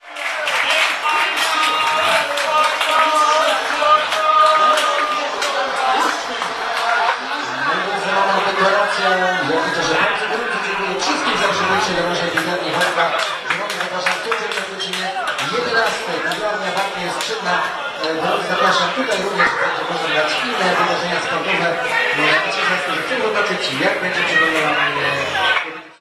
spain wins110710
11.07.2010: between 20.30 -23.30. in the beer garden (outside bar) on the Polwiejska street in the center of Poznan in Poland. the transmission of the final Fifa match between Holland and Spain.